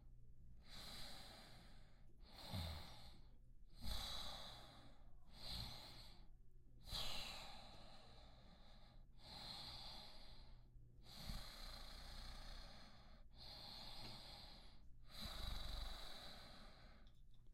Sonido de respiración humana
Sound of human breathing
aliento, Respiraci, sigh, breathing, breath, jadeo, n, wheeze, suspiro